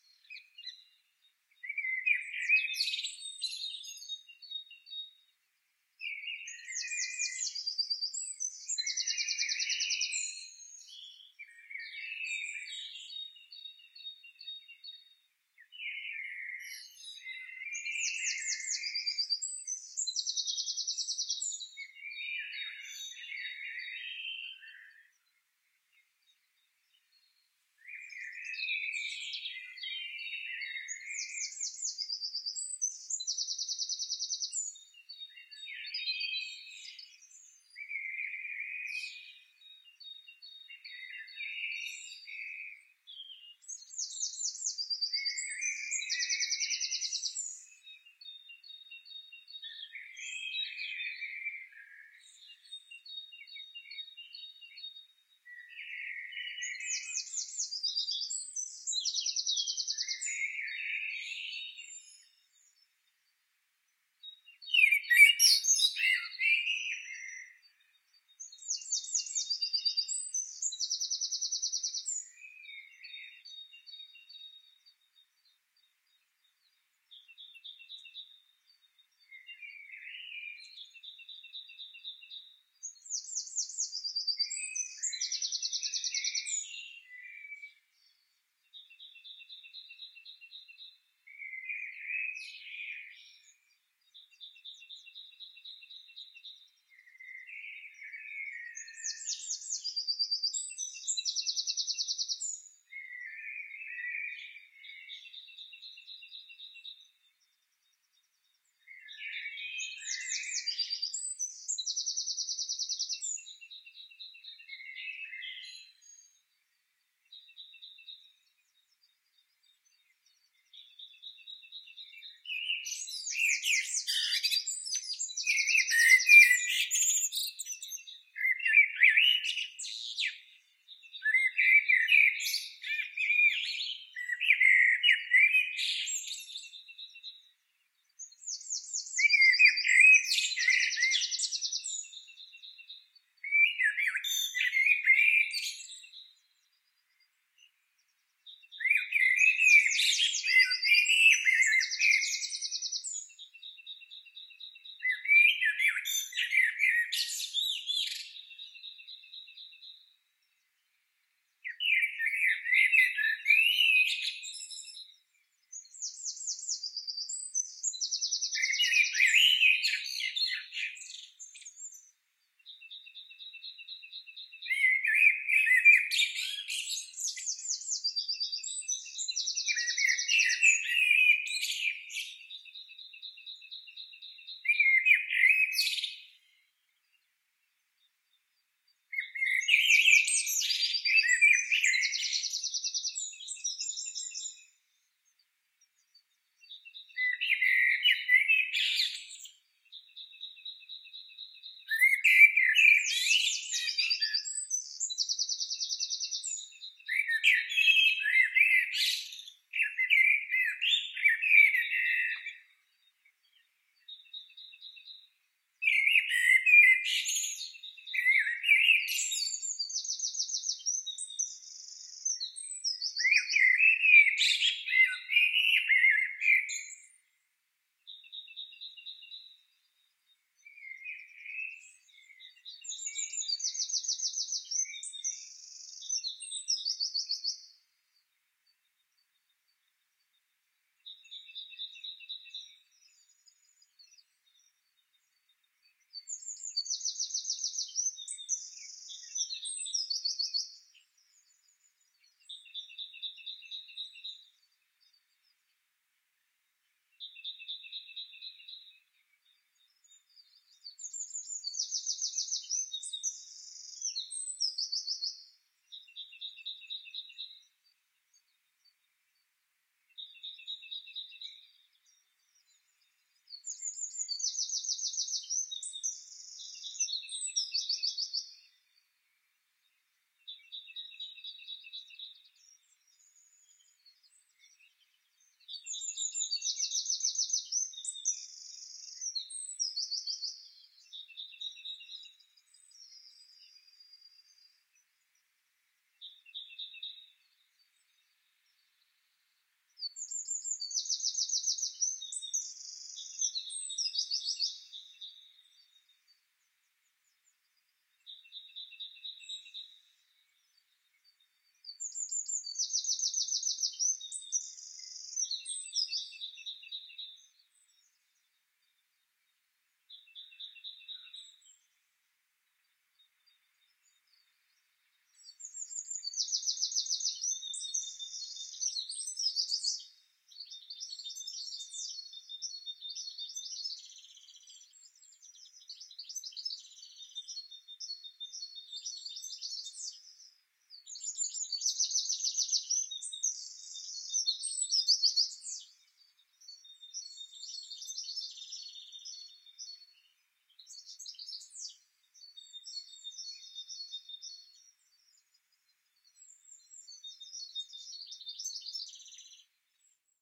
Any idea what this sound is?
Dawn Chorus - Birdsong - London - UK
A mono recording of a Friday morning dawn chorus, 5 a.m.
nature, spring, blackbird, early, chorus, field-recording, robin, coaltit, dawn, naturesound, birdsong, bird, morning, birds